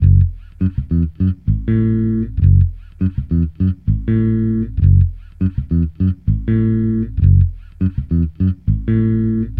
FunkBass GrooveLo0p Gm 7
Funk Bass Groove | Fender Jazz Bass
Ableton-Bass, Ableton-Loop, Bass, Bass-Groove, Bass-Loop, Bass-Recording, Bass-Sample, Bass-Samples, Beat, Compressor, Drums, Fender-Jazz-Bass, Fender-PBass, Fretless, Funk, Funk-Bass, Funky-Bass-Loop, Groove, Hip-Hop, Jazz-Bass, Logic-Loop, Loop-Bass, New-Bass, Soul, Synth-Bass, Synth-Loop